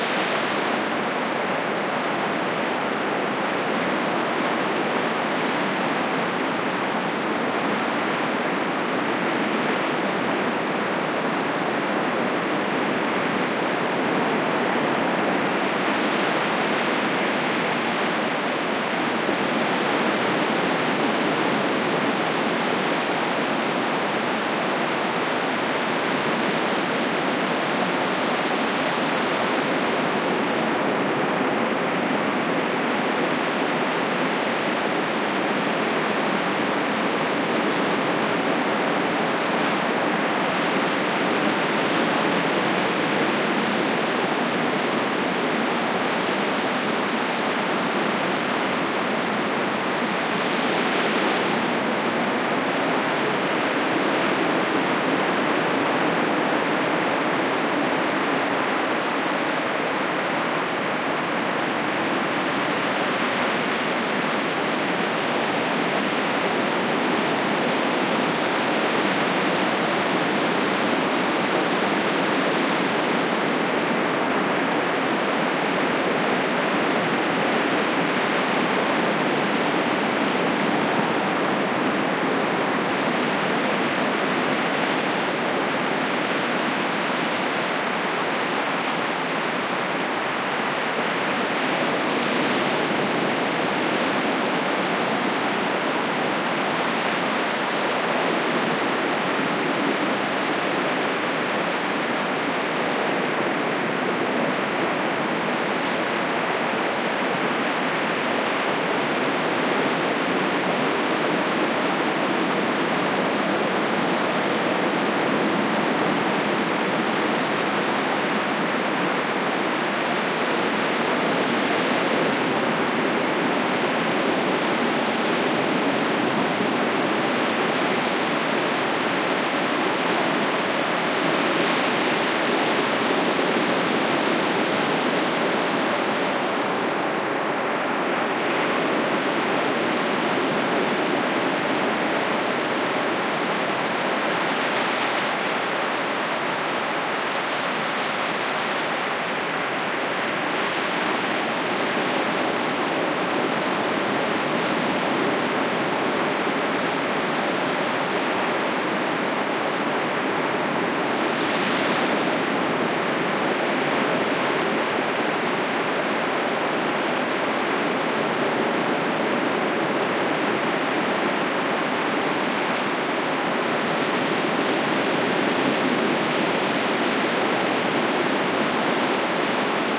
Waves cruching on a beach near Fredvang, Lofoten, Norway. Primo EM172 capsules inside widscreens, FEL Microphone Amplifier BMA2, PCM-M10 recorder